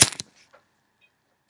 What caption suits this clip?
lighter strike
I recorded the striking of a bic lighter on my macbook's built in microphone.
cigarette, light, lighter, strike, weed